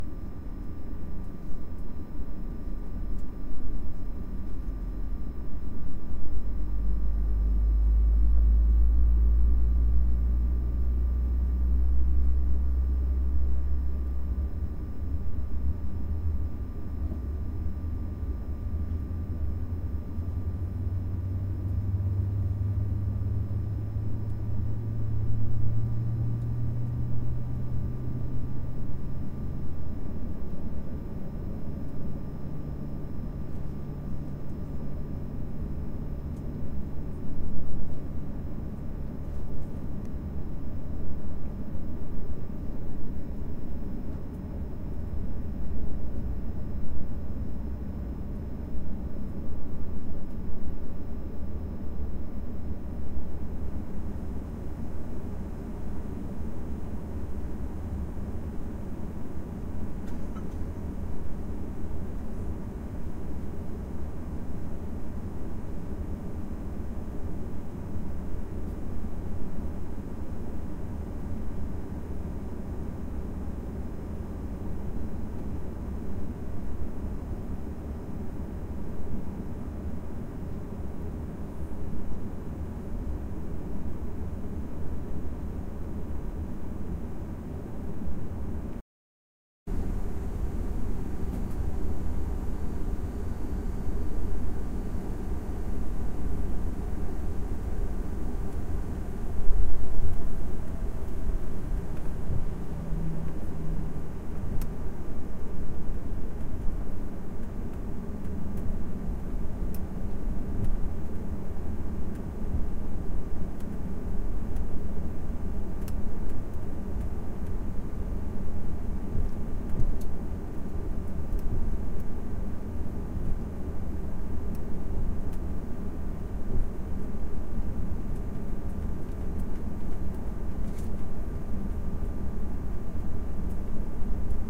Plane taxing
Recording of Plane taxiing. Apologies for clicking, something was loose on the plane
DR05, aviation, jet, take-off, engine, airbus, aircraft, landing, Recorded, airplane, runway, flight, Tascam, takeoff, plane